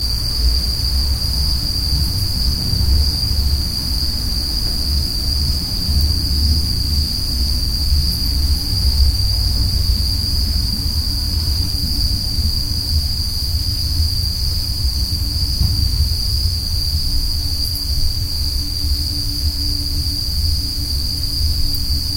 the under world
horror-fx, horror-effects, ghost, hell, thrill, terrifying, terror, horror